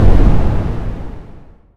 Explosion sounds made with Audacity with brown noise.
bomb, boom, detonation, explosion